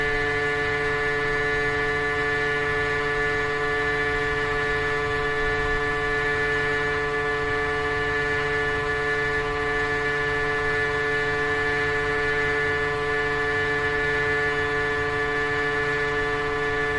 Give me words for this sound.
Quarry Machine Hum
A large piece of machinery hums away.